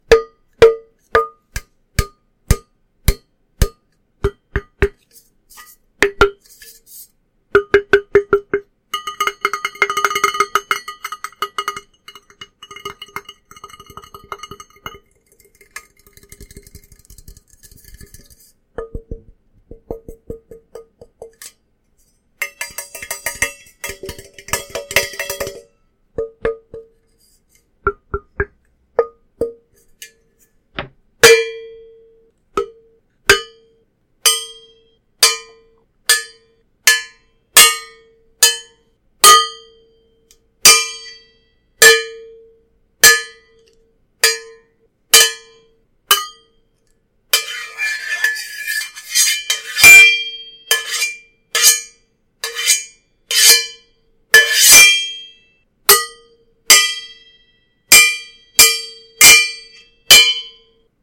metal coffee cup clangs
me hitting a large metal coffee cup to create some loud clanging noises. this was recorded indoors at my desk.
steel, metallic, hit, impact, cup